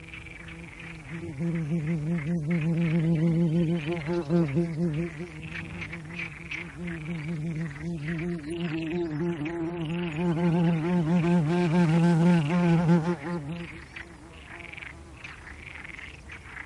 buzzing from a large bee, frogs in background. Recorded at Laguna de los Ansares, near Doñana S Spain